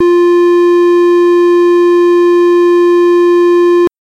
LR35902 Square F5
A sound which reminded me a lot of the GameBoy. I've named it after the GB's CPU - the Sharp LR35902 - which also handled the GB's audio. This is the note F of octave 5. (Created with AudioSauna.)
chiptune, fuzzy, square, synth